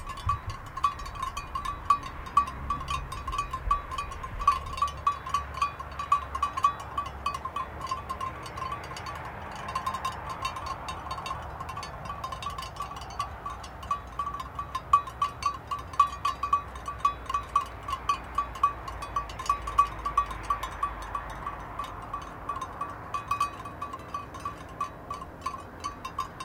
Sheep bells. Sheeps grassing on field outside Lillehammer, Norway. Distant traffic.